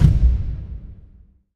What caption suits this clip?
Large drum strike, suitable for film, film score, trailer and musical tracks.
Made by closing a car door in an empty underground parking, with some eq and dynamics processing.
drum, film, large, movie, strike, trailer